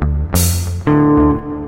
riff and high hat blues loop03
I'm always eager to hear new creations!
guitar, blues, high, riff, loop, hat